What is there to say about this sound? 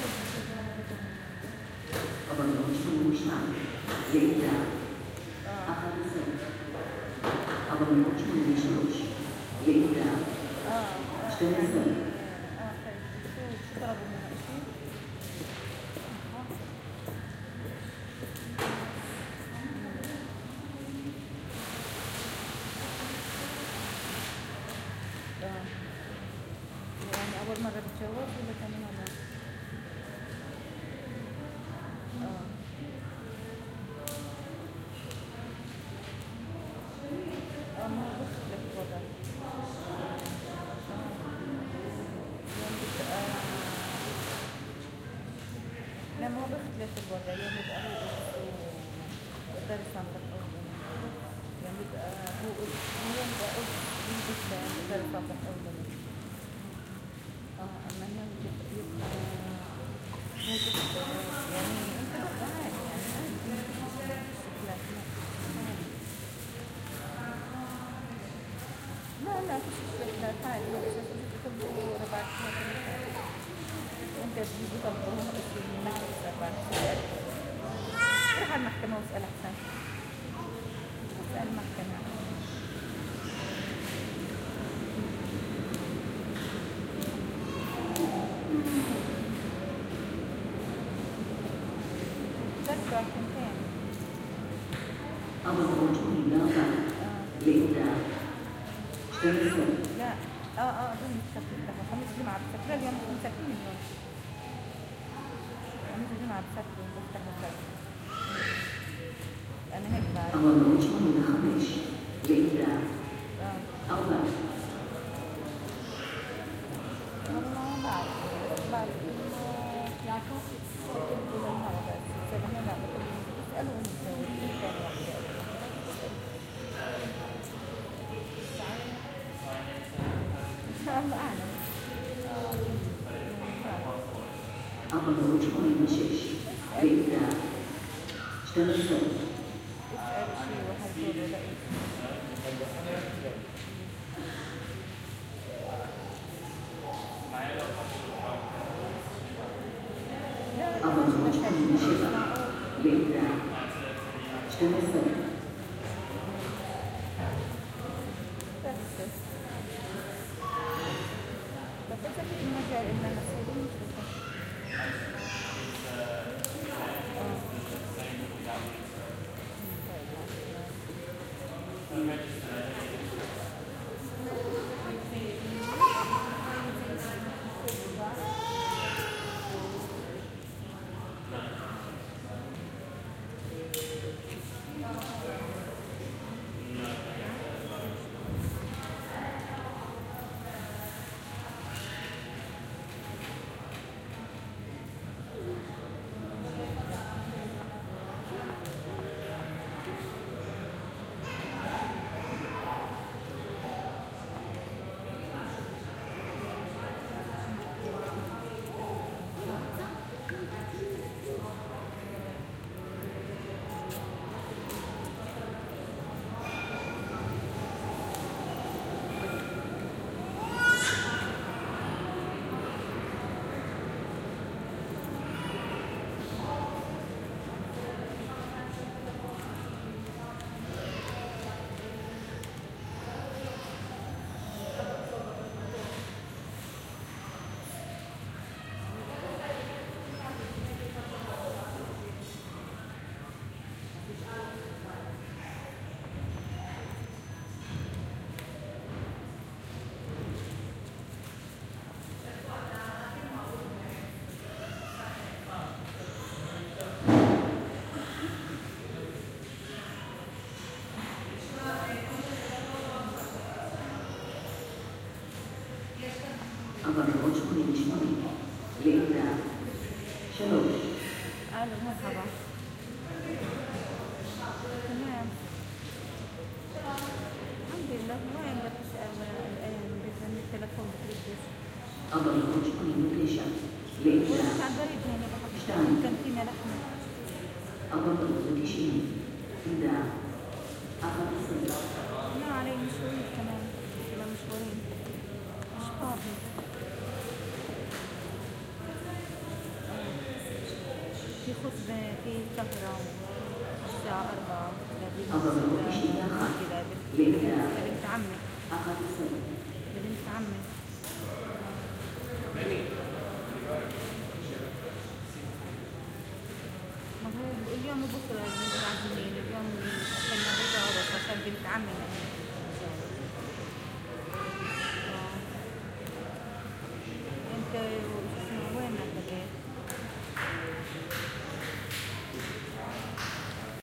Sitting in the Postoffice in Jerusalem, waiting in the queue to buy one stamp for a postcard. eventually the announcement for the next customer to proceed to the counter.